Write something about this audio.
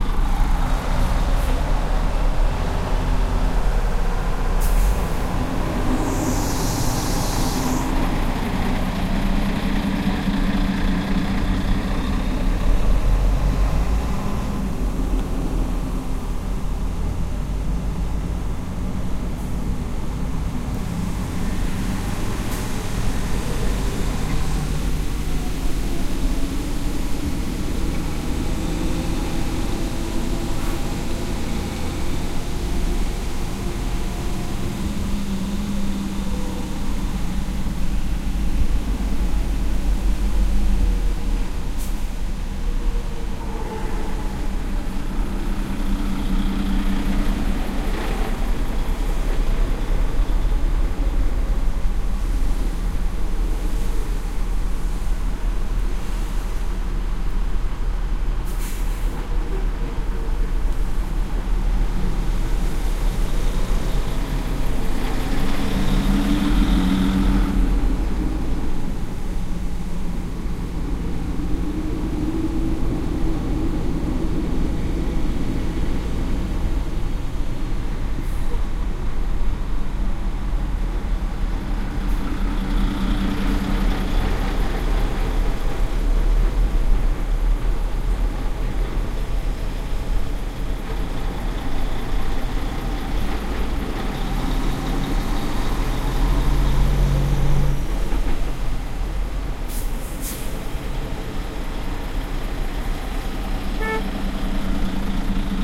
I-96 east in Novi, MI, hot summer evening just after a downpour, wet road, semi-truck in the next lane.
Recorded with a Tascom DR-05.